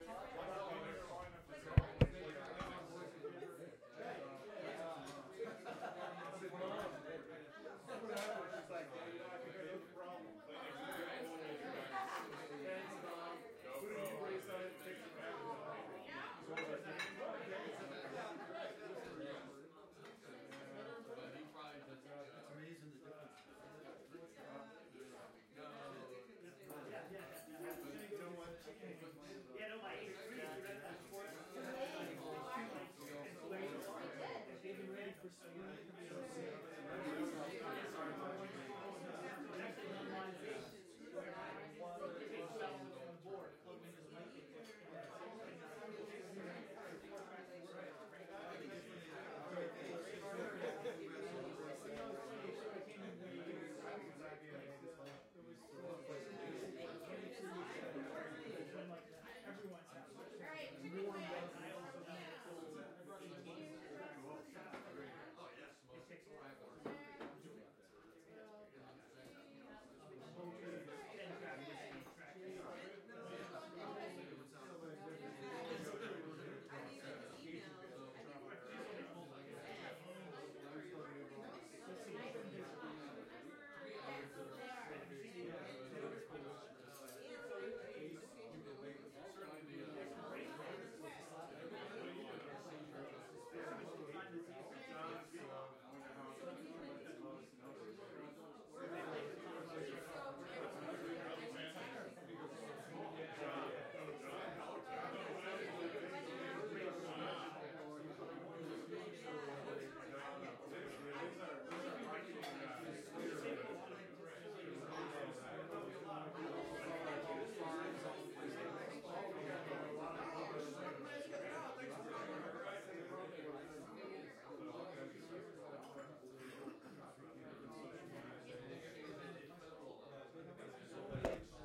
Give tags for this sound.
party; festival; pub

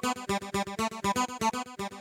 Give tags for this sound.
wave; loops

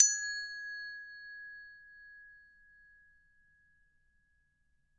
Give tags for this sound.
bell; Christmas; percussion

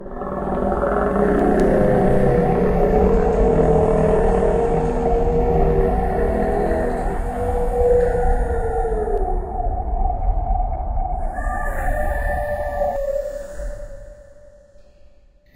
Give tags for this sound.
kaiju; roar; creature; whale; dinosaur; monster; animal; beast

